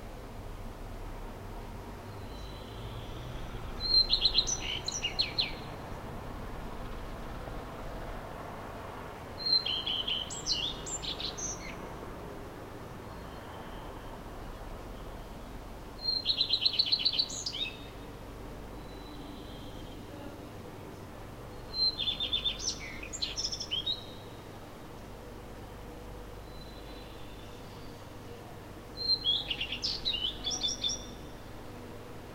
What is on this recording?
morning birds ambience
Early morning sounds recorded at countryside. Singing birds, light road/wind noise. Oktava-102 & Behringer UB 1202 used.
birds, morning, nature, field-recording, countryside, ambience